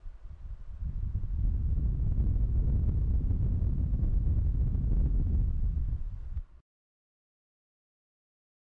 slow to fast, loud, rumbling wind.
loud, wind, rumble